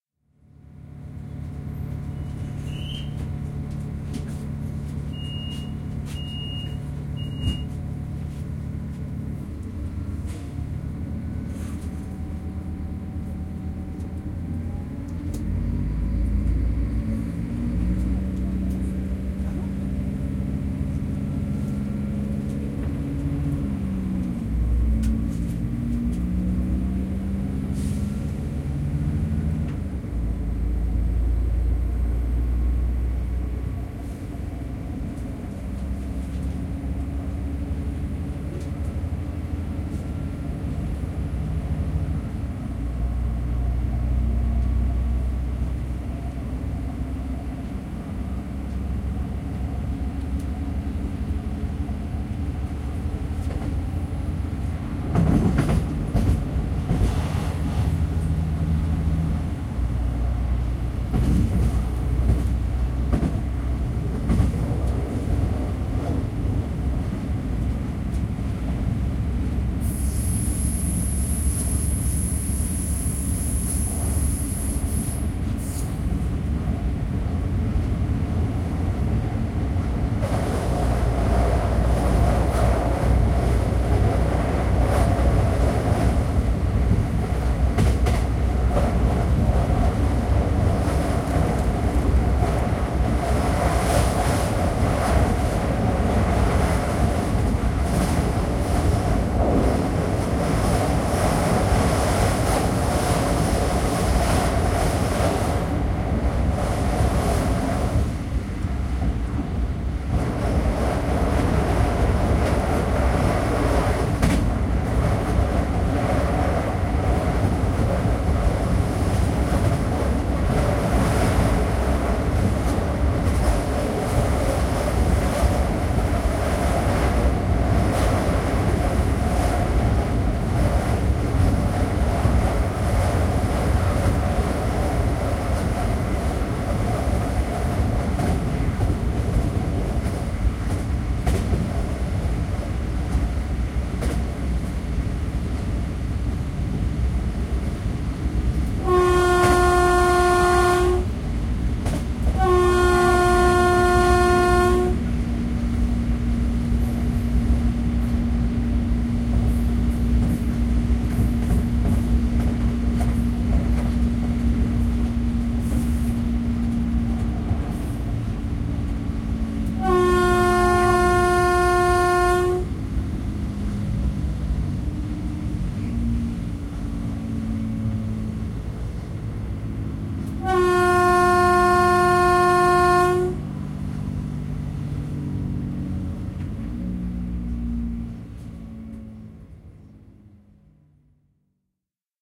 Train riding (inside)
Train running somewhere between Kudowa Zdrój and Wrocław (Poland),
Some nice train sounds from inside.
Recorded with Lenovo p2 smartphone.